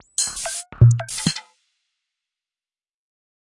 weird 1 loop166
Another loop at 166BPM, glitchy, minimal, weird, useless.
minimal, glitch